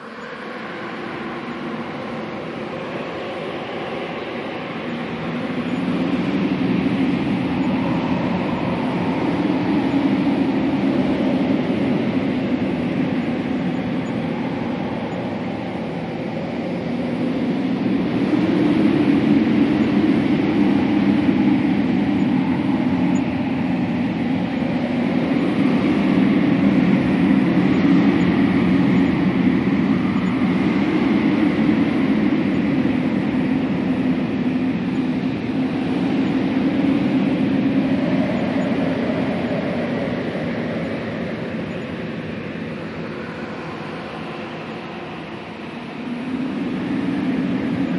Howling Wind
This was created with a synthesizer and reverb though it sounds almost like real northern icy wind.